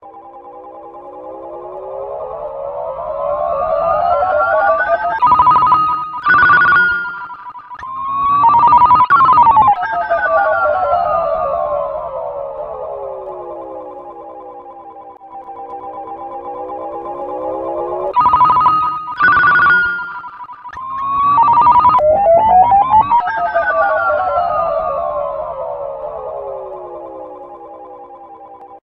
Crank Ringtune (4th G. Edition 1.00c)
This Ringtone is a remake from the movie crank.